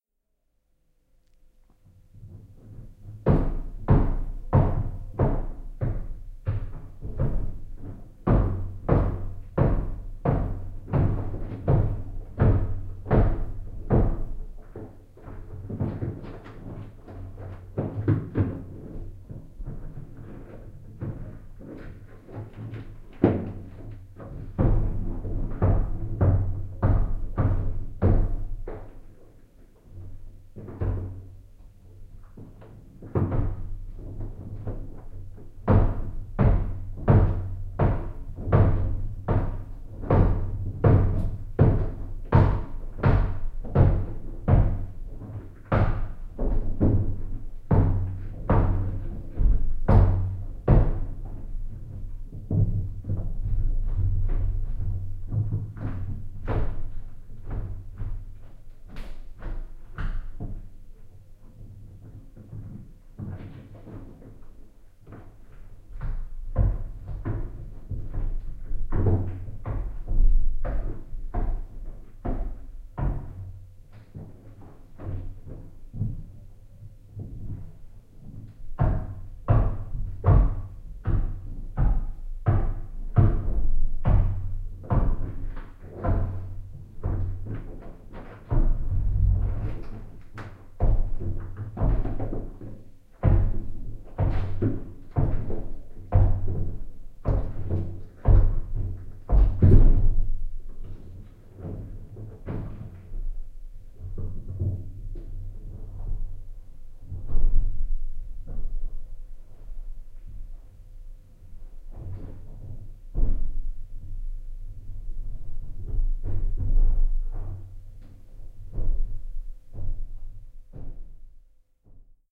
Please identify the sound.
Binaural field-recording of my upstairs neighbors stomping from early in the morning until late in the afternoon. The recording is made in the bathroom, so, you can hear an interesting reverb and resonances.
binaural, stomp, neighbors, field-recording